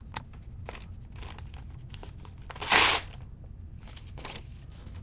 Tapping an empty Svedka bottle